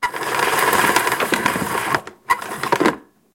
vacuum cleaner cable retracts